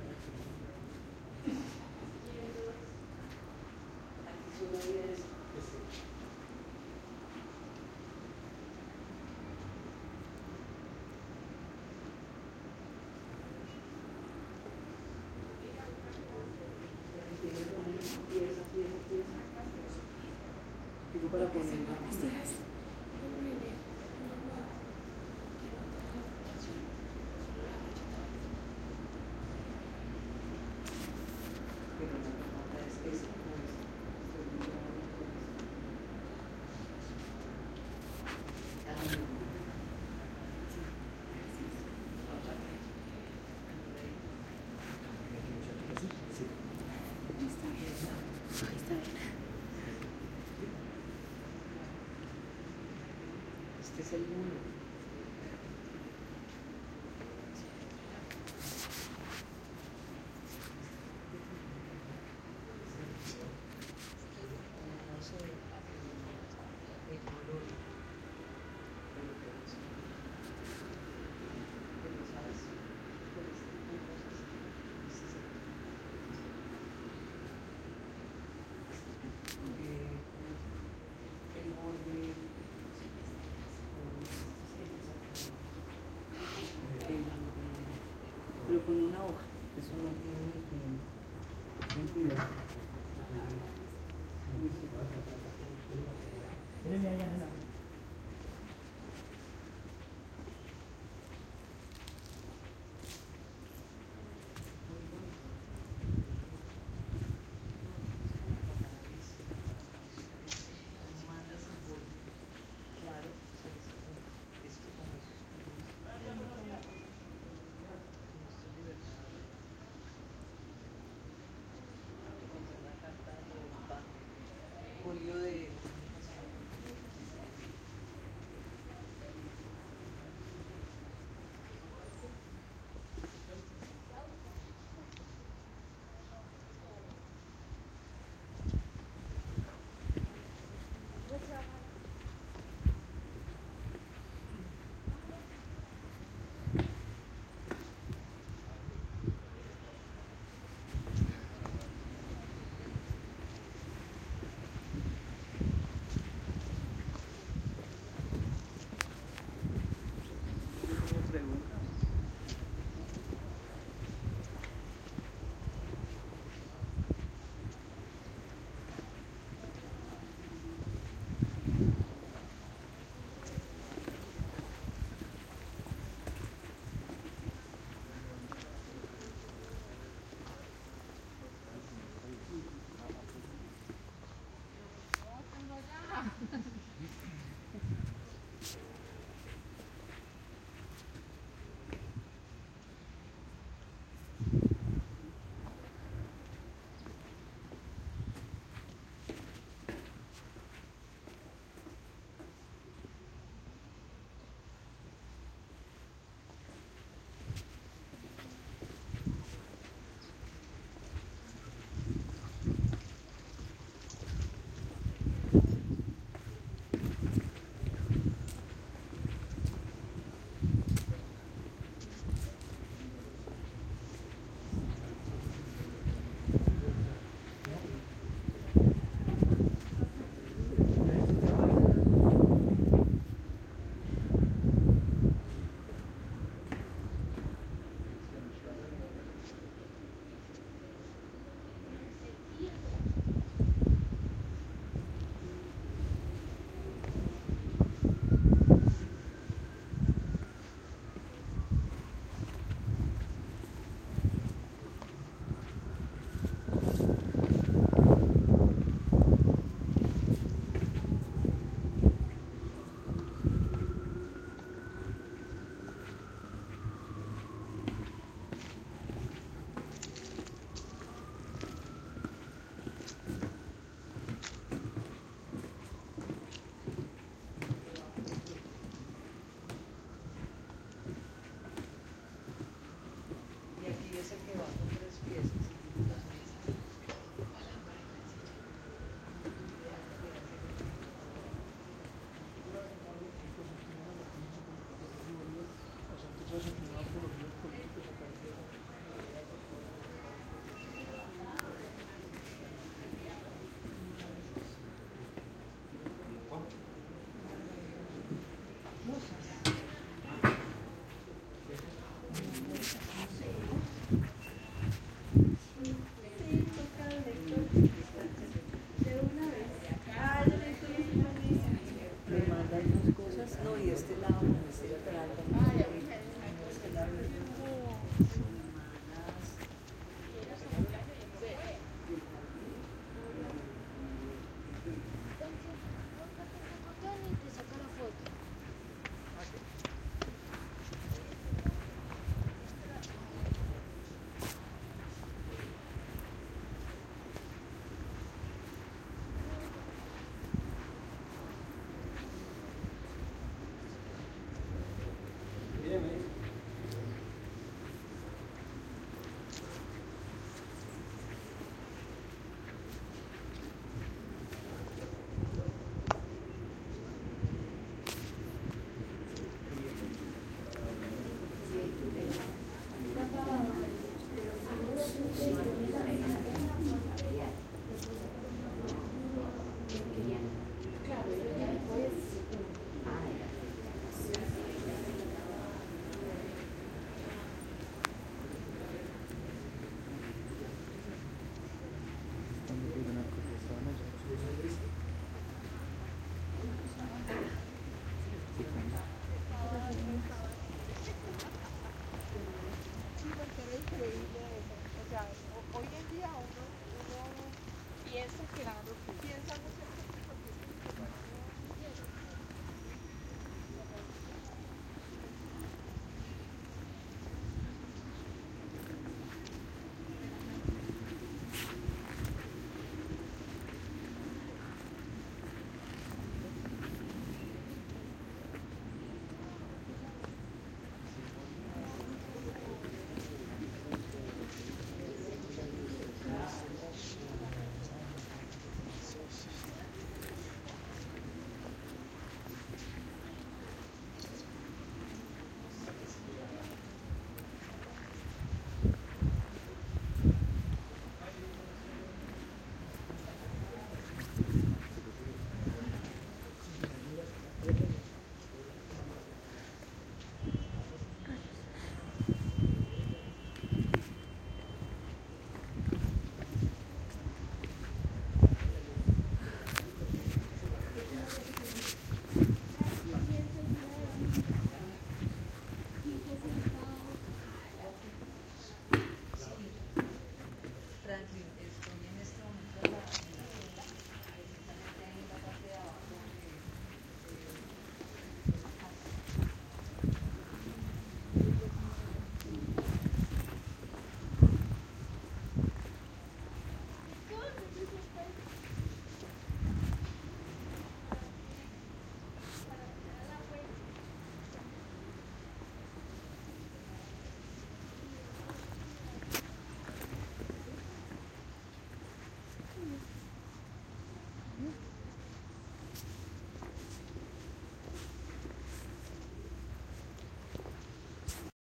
Patrimonio quinta de Bolivar-Toma 6-Evelyn Robayo-Natalia Niño - 25:02:20 10.17
Toma combinada a dos micrófonos al rededor de la quinta de Bolivar en la ciudad Bogotá. Para la realización de estas tomas se utilizó un teléfono celular Samgung galaxy A10 y Moto G5. Este trabajo fue realizado dentro del marco de la clase de patrimonio del programa de música, facultad de artes de la Universidad Antonio Nariño 2020 I. Este grupo está conformado por los estudiantes Natalia Niño, Evelyn Robayo, Daniel Castro, David Cárdenas y el profesor David Carrascal.
soundscape, paisajesonoro, artesonoro